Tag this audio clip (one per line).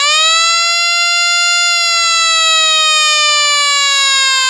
siren
security
alert
Alarm